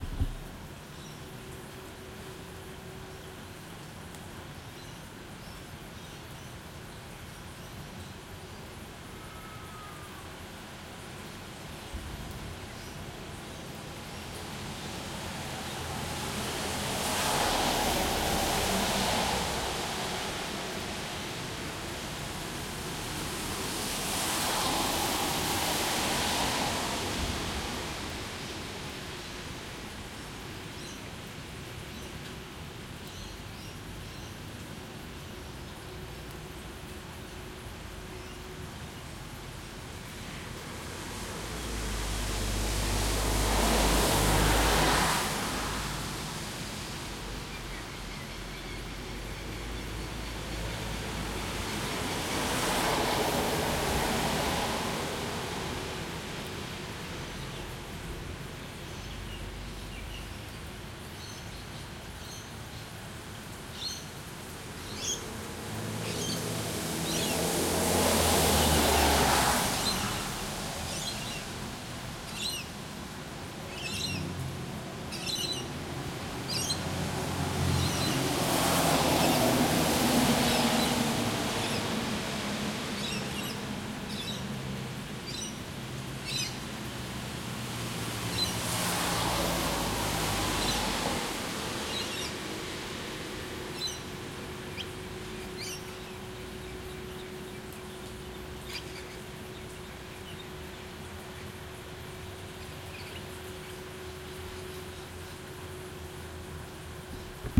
cars driving on wet suburban street with ambience.